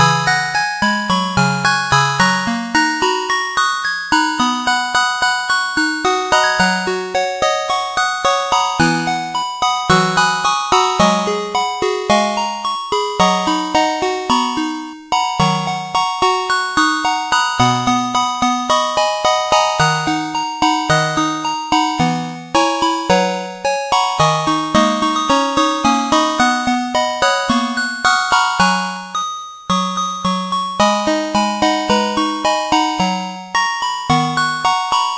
Centaur Forest
Randomly generated melody.
game-design, soundtrack, video-game, 8-bit, retro